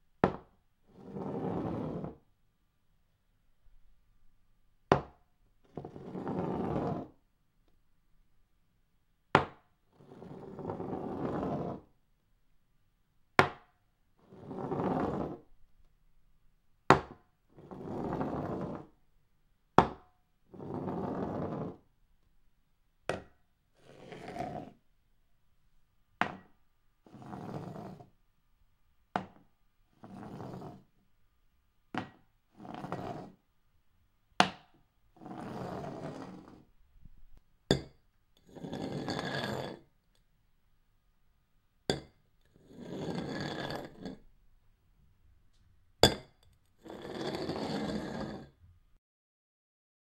Glass On Bar
Sound of a pint glass sliding down a bar